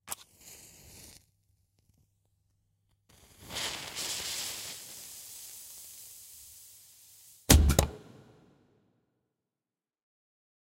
Recorded for a musical with children with 2 x Schoeps MK 20 in a small AB. Additional a TLM102 close to the bottom of the bomb fur the fuse. With some room on the "explosion"
This is not the whole recording. It is cutted after the "explosion". Look or Tischbombe_3 if you will hear the things falling down as well.
bang, celebration, party, bomb, fireworks